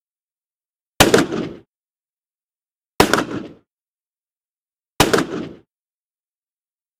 Shotgun being fired on a training range.